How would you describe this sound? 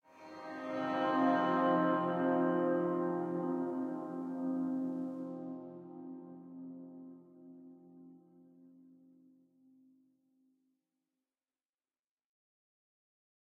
Bowed electic guitar - F5(b7, 9) chord (2)
Electric guitar played with a violin bow playing a F5(b7, 9) or Fm9(omit b3) chord
soundscape, guitar, string, orchestral, reverb, spacey, bow, chord, electric, violin, bowed, huge